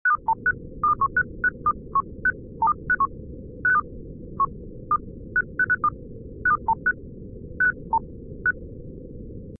This piece can easily be looped time and time again.
scifi, ambience